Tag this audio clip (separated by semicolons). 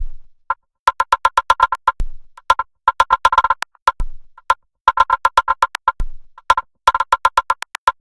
rhythmic; minimal